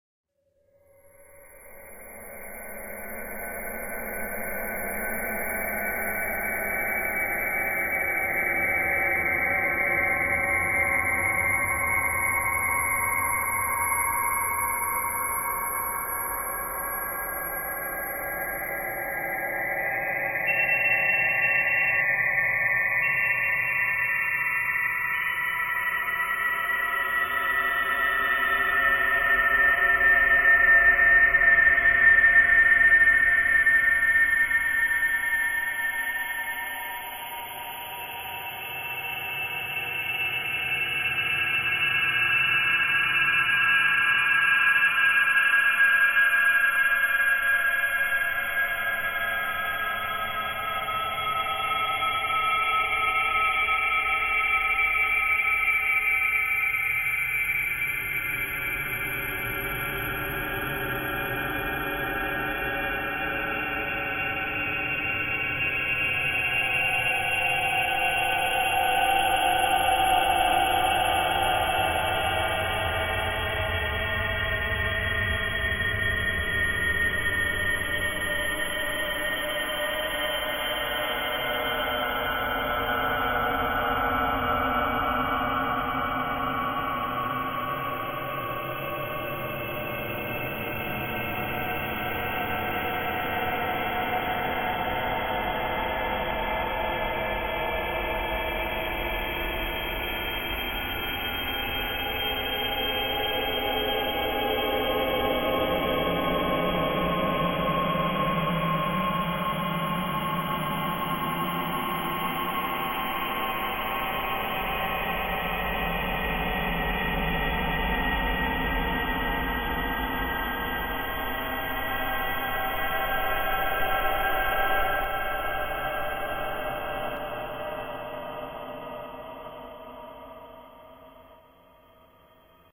A dark and scary granular manipulation soundscape.
eerie, ringing, ambient, scary, soundscape, sound-design